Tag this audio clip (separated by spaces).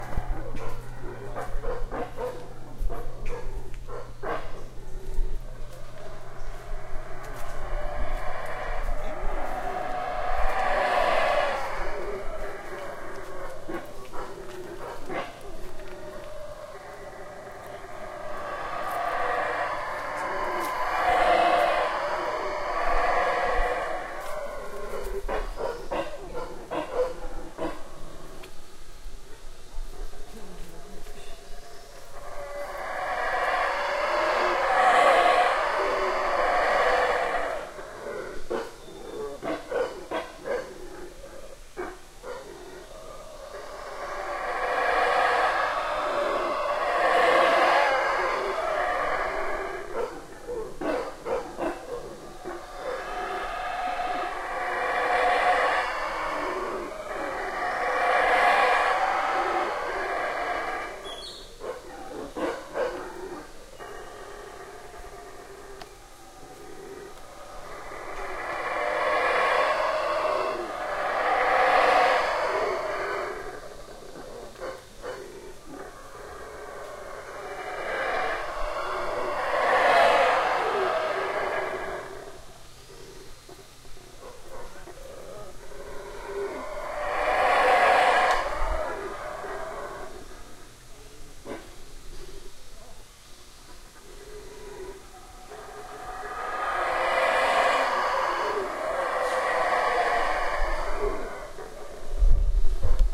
fighter howler jungle monkey star tie wars